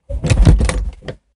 Violently Closing Wooden Door 2
Sound of a door closing smh
Noticed that my door was quite loud - so I recorded some sounds of it with my phone close to the moving parts of the door.
closing, grab, phone, help, open, foley, umm, opened, close, door, mechanical, wood, idk, recording, fx